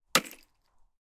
Digging, Ice, Hammer, B
Audio of digging into a block of ice with the face of a metal hammer. The recorder was approximately 1 meter from the ice.
An example of how you might credit is by putting this in the description/credits:
The sound was recorded using a "Zoom H6 (XY) recorder" on 1st March 2018.
ice, dig